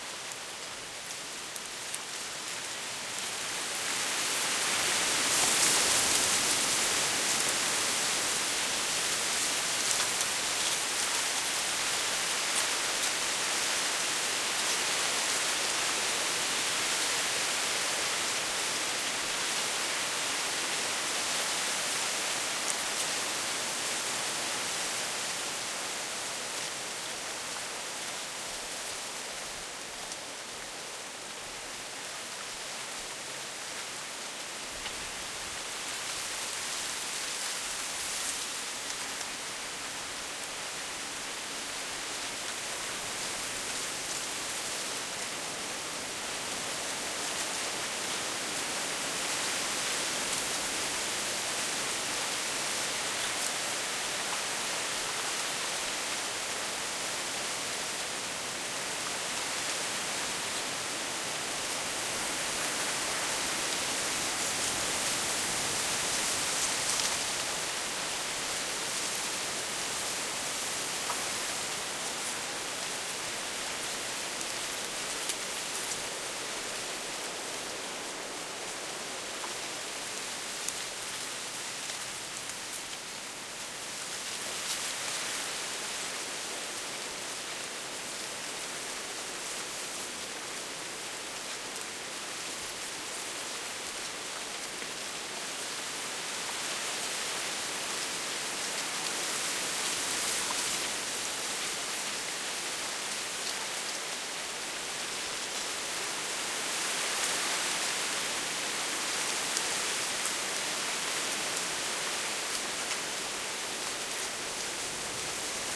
20061224.wind.canaveral
wind gusts hit a bed of Reed
south-spain, nature, reed, wind, winter